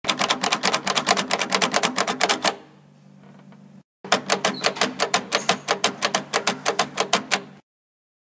door handle
door, Handle, sound, fresh